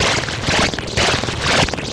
A squishy mechanical loop.